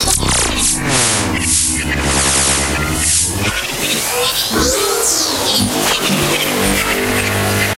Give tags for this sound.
abstract; atmosphere; background; cinematic; dark; destruction; drone; futuristic; game; glitch; hit; horror; impact; metal; metalic; morph; moves; noise; opening; rise; scary; Sci-fi; stinger; transformation; transformer; transition; woosh

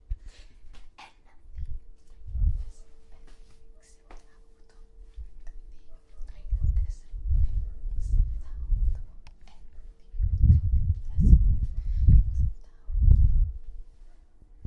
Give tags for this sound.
practice; moves; dance; dancing; counting

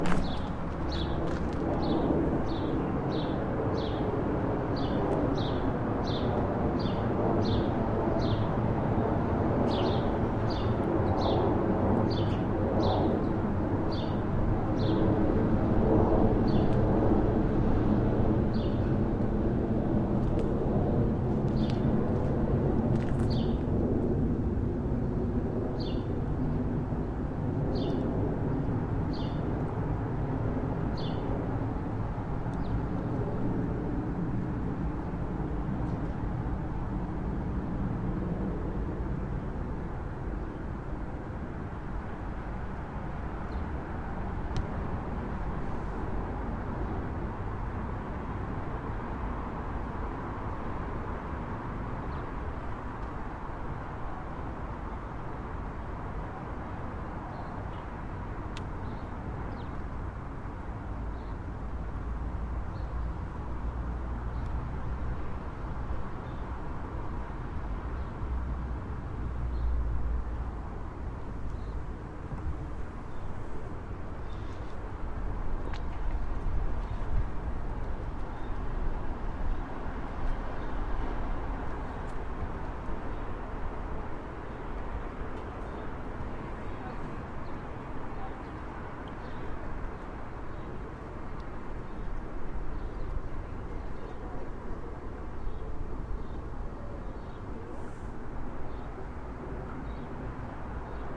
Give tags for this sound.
flightpath; clair; st; toronto; bird

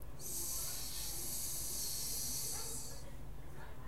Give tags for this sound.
fizzle; blow; steam

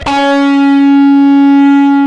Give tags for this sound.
guitar; bass; multisample

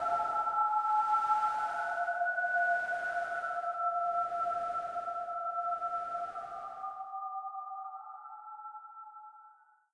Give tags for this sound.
distorsion; bizarre; dreamlike; ring

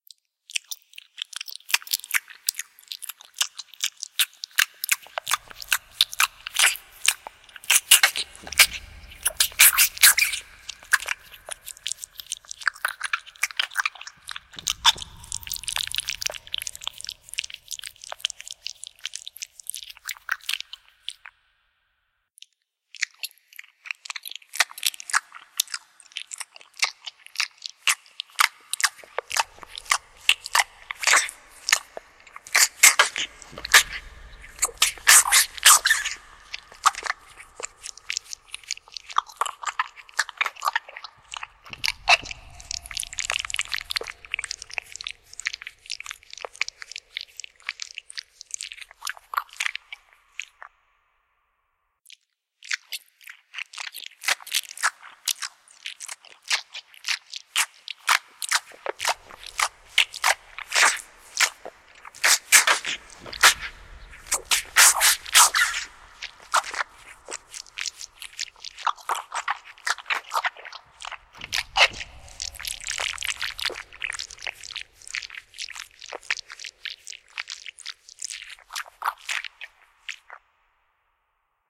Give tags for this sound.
gross; eat; creature; monster; devour; squelch; animal; eating; gore; creepy; wet; flesh; blood; horror; slime; squishy; meat; consume; death; food

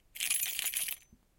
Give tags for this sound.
car; keys; shaking

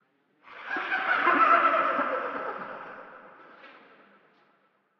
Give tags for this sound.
Creepy; reverb; Gate; Stinger; Closing; modulations; effects; me; chorus; Opening; Horror; Laugh; Close

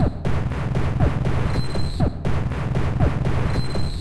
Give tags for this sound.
TLR; 120-bpm; NoizDumpster; noise; rhythm; percussion; VST; TheLowerRhythm; synth-drums; noise-music; loop

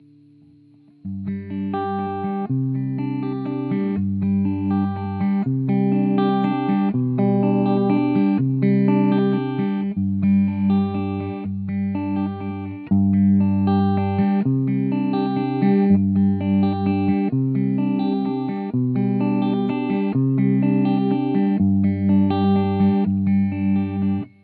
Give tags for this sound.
chords; guitar; improvisation; rhythm

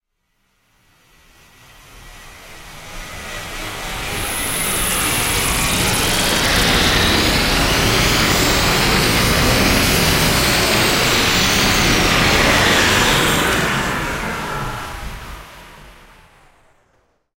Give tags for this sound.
Train; Warp

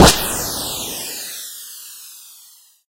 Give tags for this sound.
army; artillery; bomb; boom; destruction; explosion; explosive; game; games; military; video; war

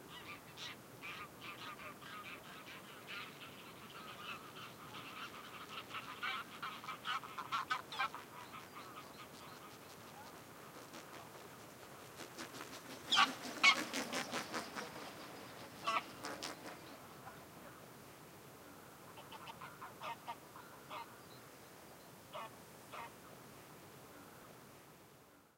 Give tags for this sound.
ambience; geese; ambiance; Bird; spring; wings; Sounds; birds; flying; goose; birdsong; general-noise; nature; field-recording; ambient; bird-sea

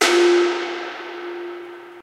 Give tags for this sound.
edited; f4; natural-ambiance; pitched-percussion

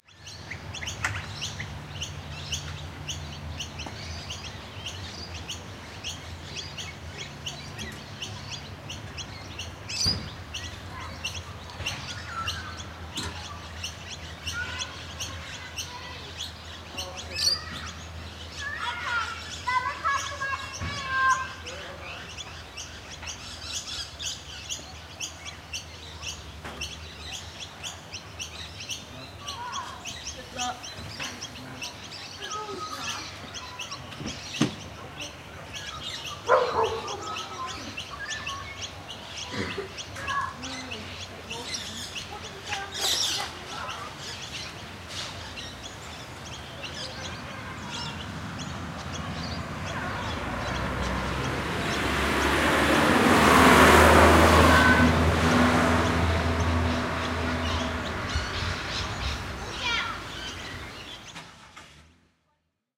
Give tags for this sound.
car-pass; children; dog-bark; noisy-miner; parrot

